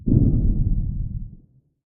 Computer generated explosion.
{"fr":"Explosion","desc":"Explosion créée numériquement.","tags":"explosion boom detonation bombe"}
bang, bomb, boom, explode, explosion